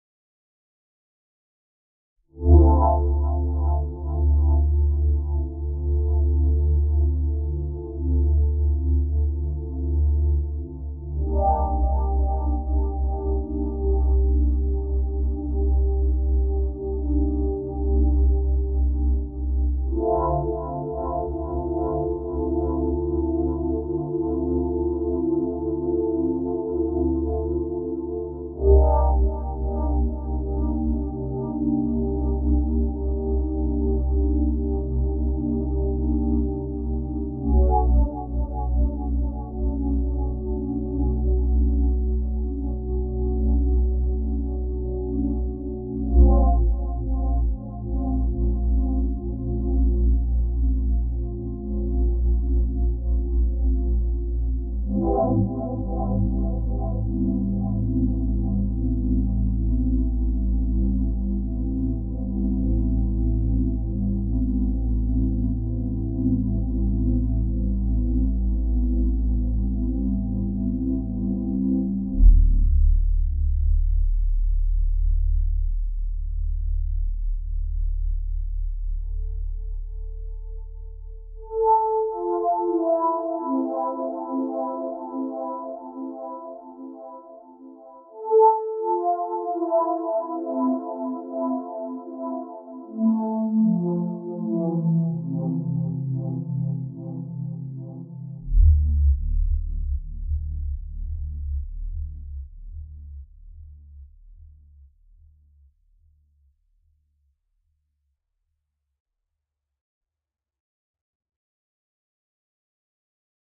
Chord One (Pad)
A chord of six notes transposed. In the final have the notes of the first chord separated.
Delcraftmusic.
transpose, silence